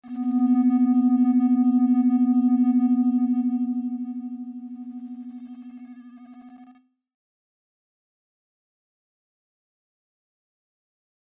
Another wipe I created using the Grain app.
sfx, soundfx